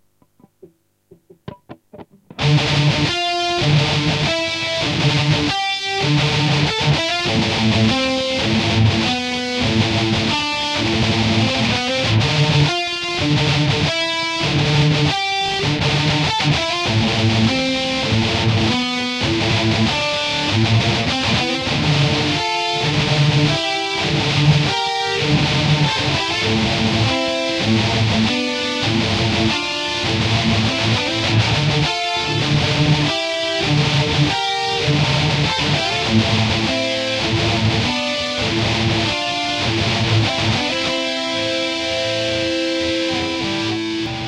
A little guitar riff i recorded yesterday
congadh, electric, electric-guitar, gitarre, guitar, harcore, metalcore, riff, rock